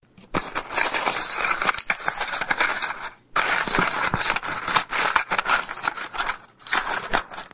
Amo Mag for gun
He is a simple sound of gun magazine being loaded, the audio is from round head fastners and create audio simlair to those gun shells.Enjoy,
Created by:Allan Zepeda
Equiptment:Olympus WS600
shells, explosions, ammo, gun